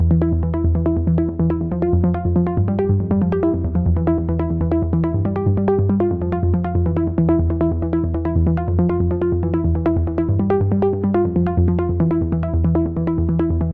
Rising and falling arpeggiated synth loop played and tweaked in NI's Kore Player.